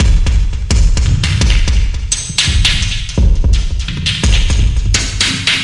Glitch Drum loop 5a - 2 bars 85 bpm
Loop without tail so you can loop it and cut as much as you want.
drums, loop, drum-loop, beat, percussion-loop, glitch, percussion, electronic, drum, rhythm, groovy